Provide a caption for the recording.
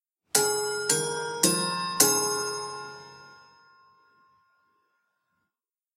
Westminster Quarters, Part 3 of 5
Plastic pen striking sequence of four rods from this set of grandfather clock chimes:
Roughly corresponds to G#4, A#4, C5, G#4 in scientific pitch notation, which is a key-shifted rendition of the third grouping from the Westminster Quarters: